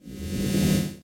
Teleport Staticky
teleport to the realm of static
fi, laser, sci, static